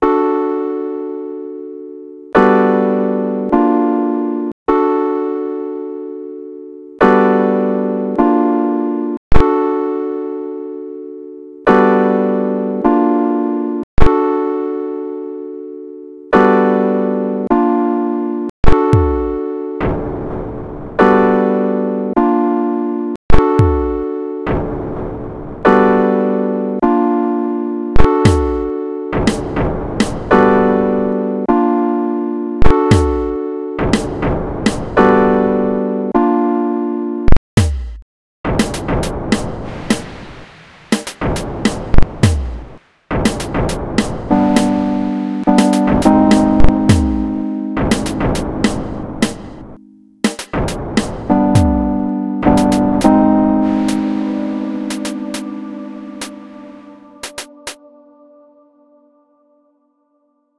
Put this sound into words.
lo fi
bass, beat, bpm, drum, fi, loop, kick, lo